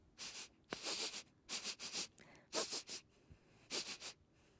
Quick Sniffing
person, quickly, sniffing